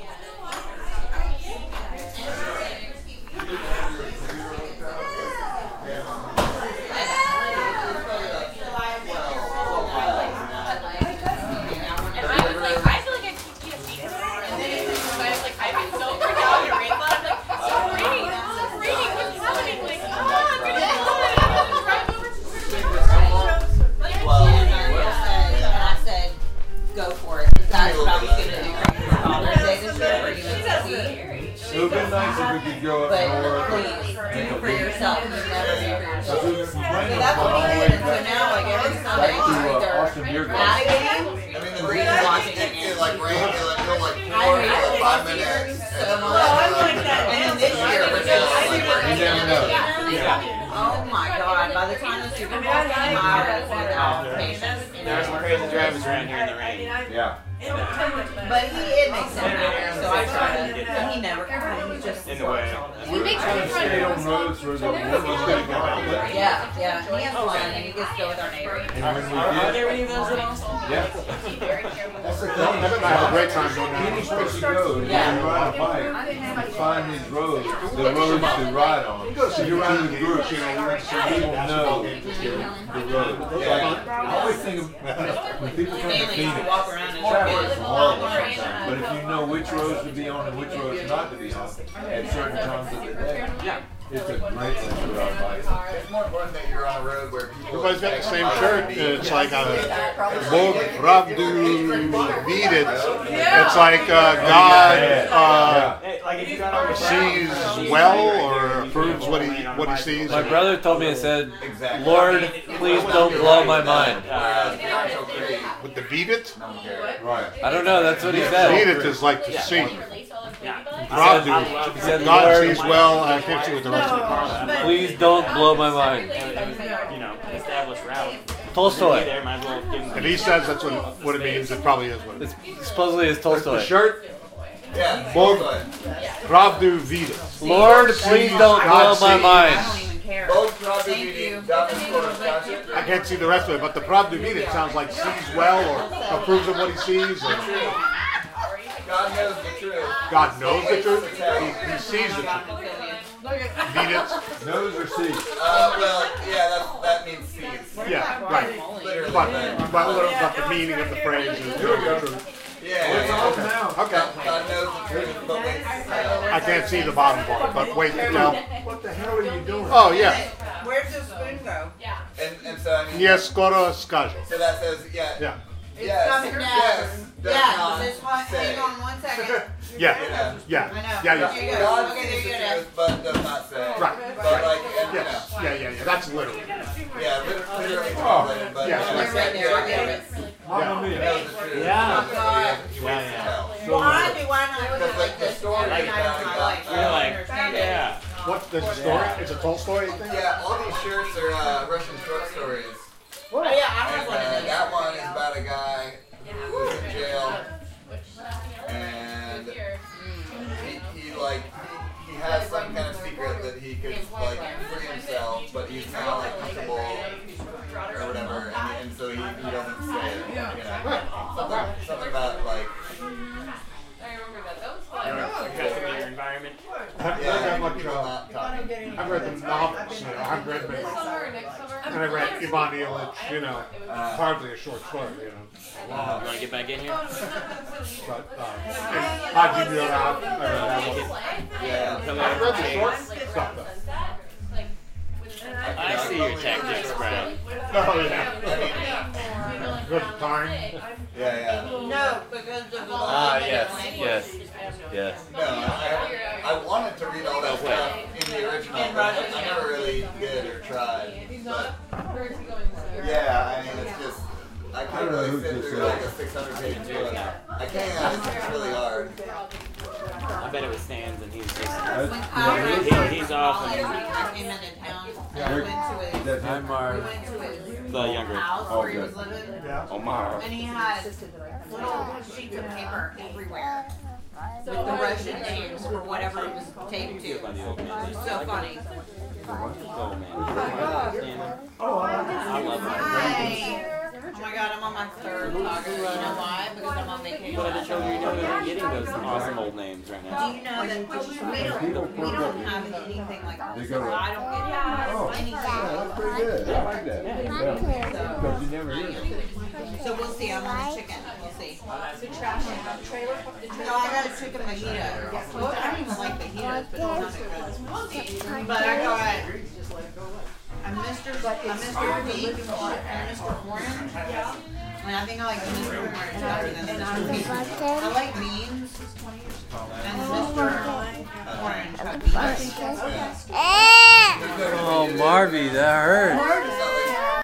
monday night crazy
Ambience at my house before a big family dinner